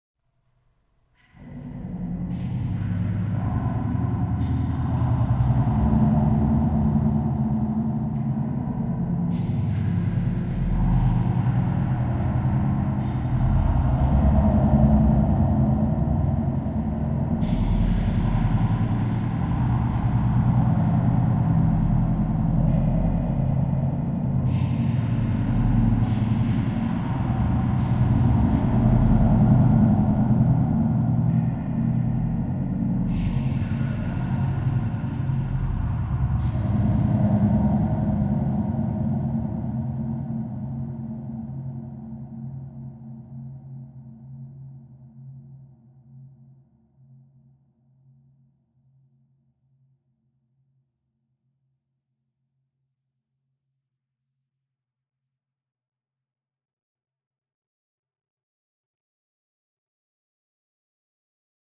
Using a recording of a silly sentence I said a few times. First, I layered the sound a few times so as to get rid of any real pauses. Then, I reversed it, inversed it, and added delays, reverb and a pitch shift.